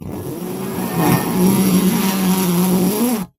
BS Zip 7
metallic effects using a bench vise fixed sawblade and some tools to hit, bend, manipulate.